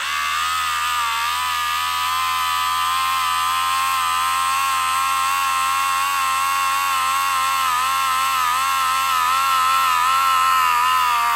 A stereo recording of a small 12V DC motor. This is one of the motors used to adjust a car side view mirror ( still attached to the mechanism). Rode NT-4 > FEL battery pre-amp > Zoom H2
electrical, xy, stereo, mechanical, motor